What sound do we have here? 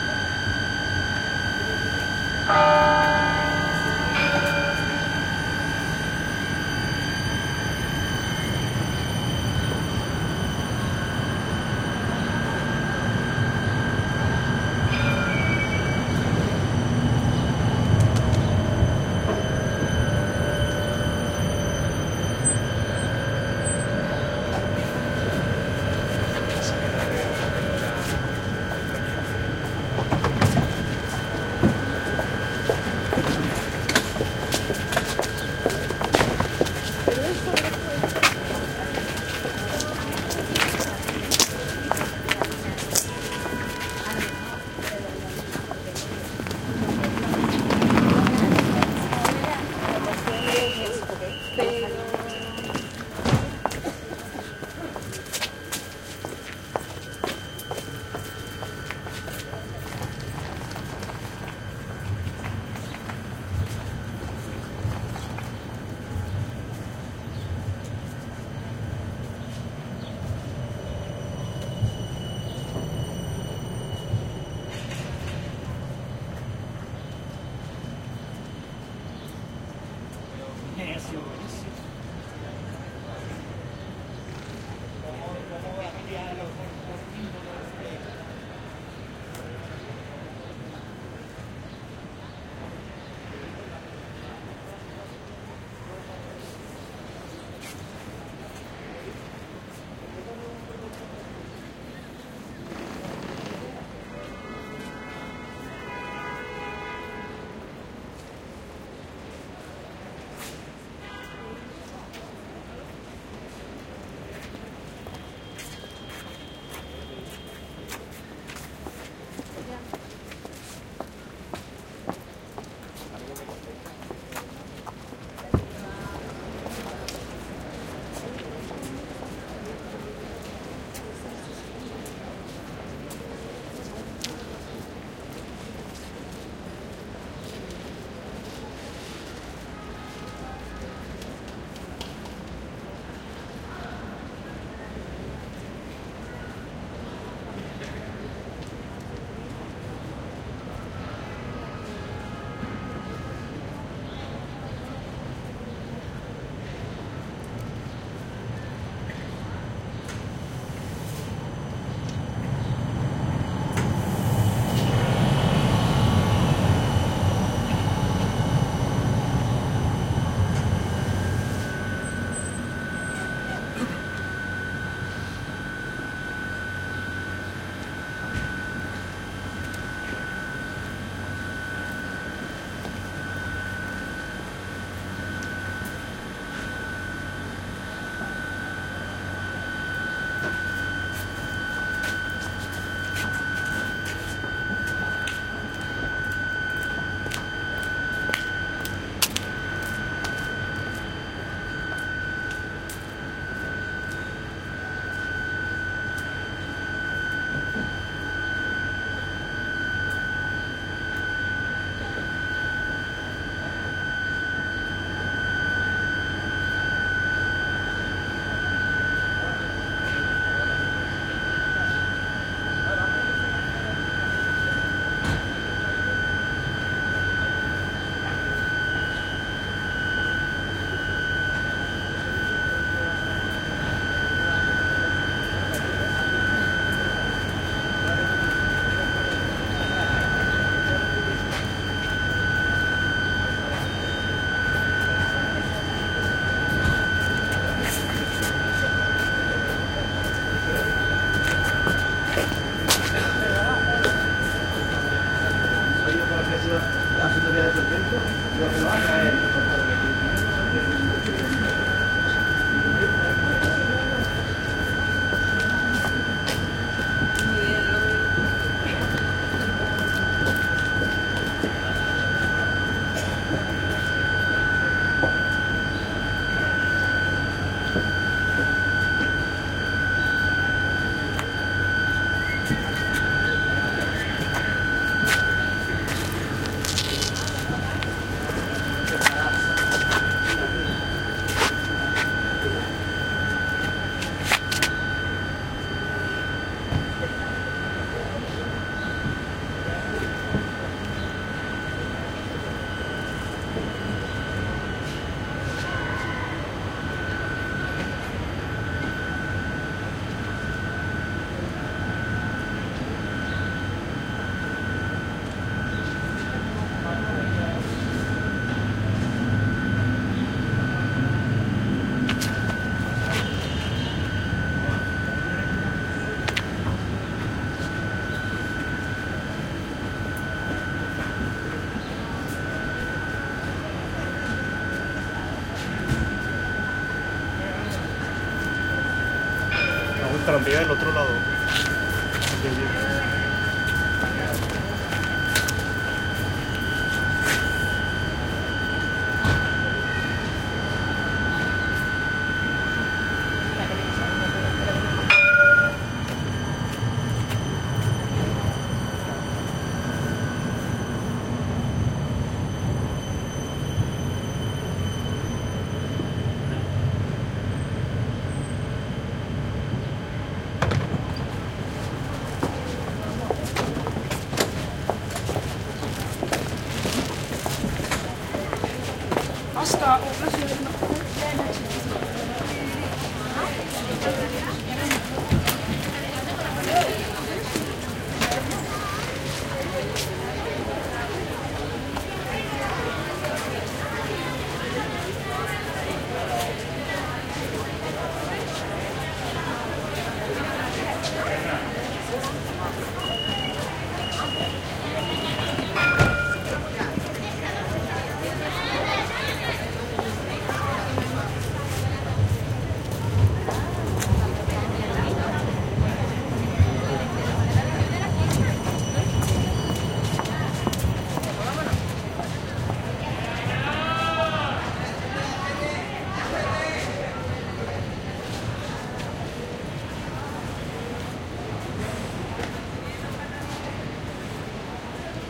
20091217.16.tram.platform
a clock strikes, then noise of tram arriving to platform. Voices, feet dragging, and noise of tram car waiting for departure. Street noise in background. Recorded in Seville (Plaza Nueva) during the filming of the documentary 'El caracol y el laberinto' (The Snail and the labyrinth), directed by Wilson Osorio for Minimal Films. Sennheiser MKH 60 + MKH 30 into Shure FP24, Olympus LS10 recorder. Decoded to Mid Side stereo with free Voxengo VST plugin.
field-recording, seville, clock, platform, voices, tram, steps